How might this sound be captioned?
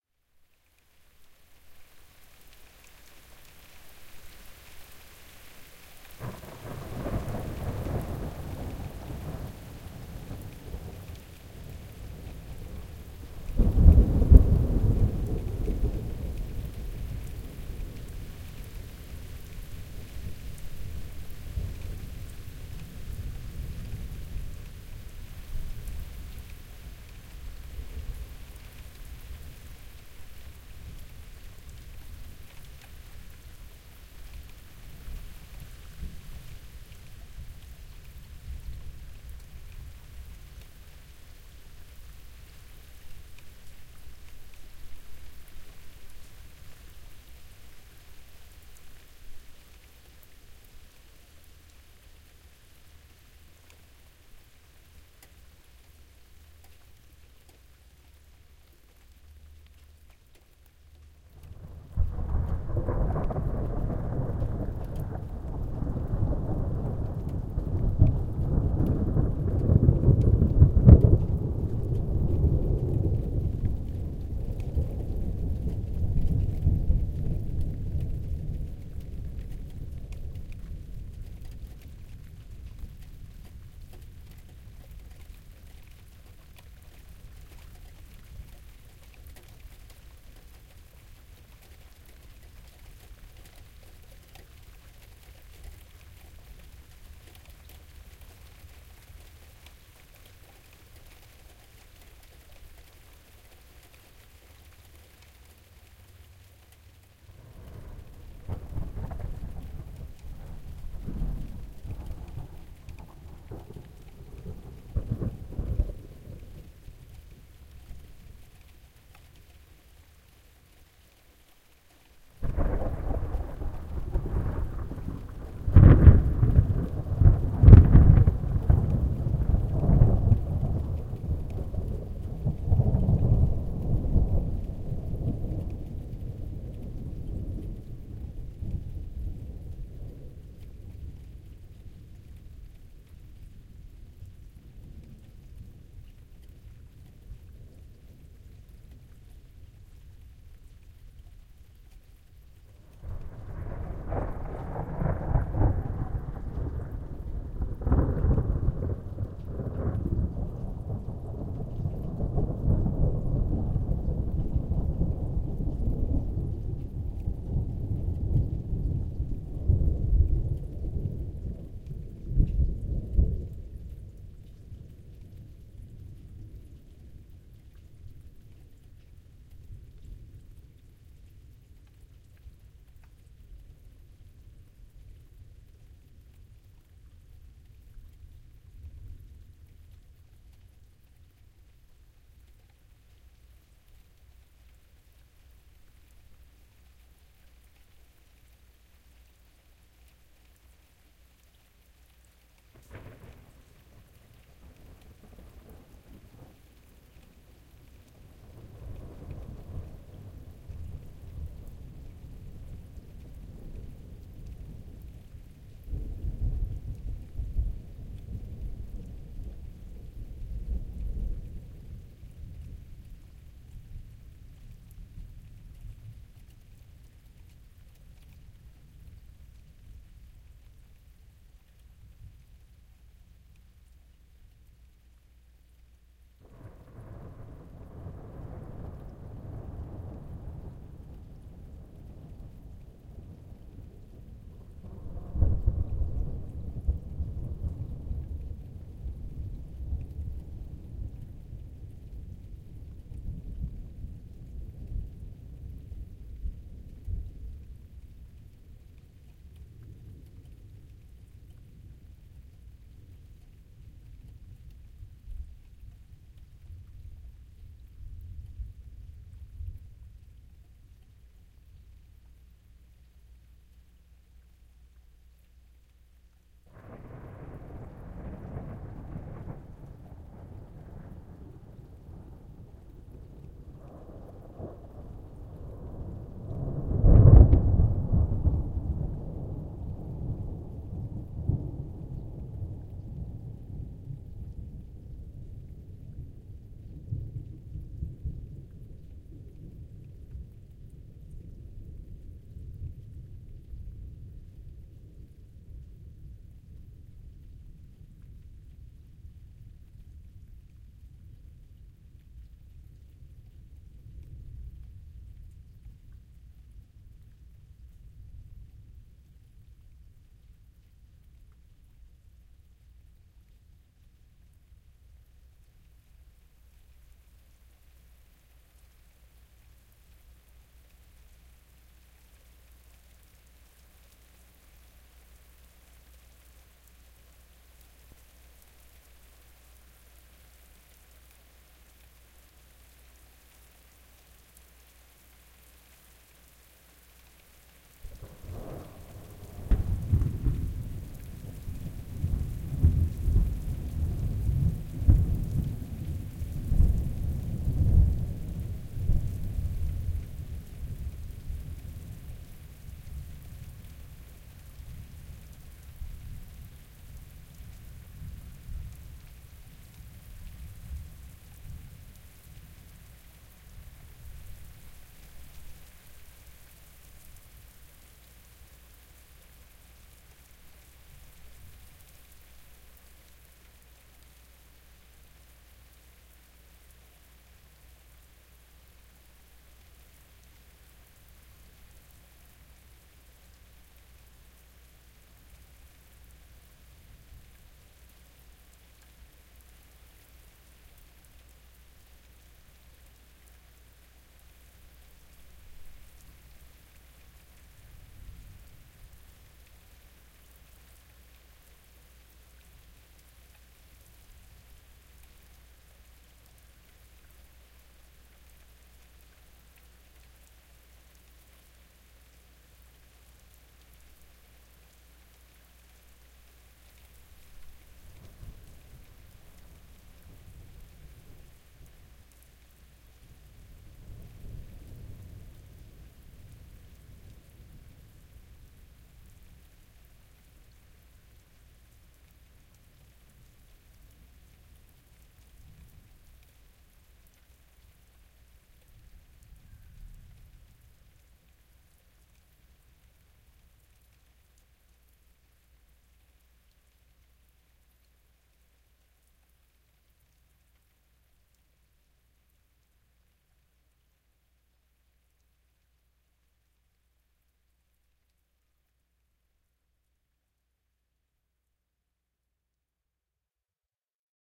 Thunder, distant cracking and rolling, light rain, wind, Toronto. Roof mounted CS-10EM mics.